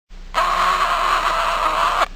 dramatically killed duck
killed, quack